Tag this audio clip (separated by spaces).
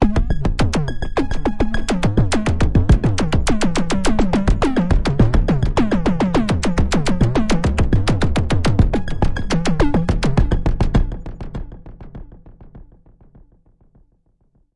130bpm synth waldorf multi-sample arpeggio loop electronic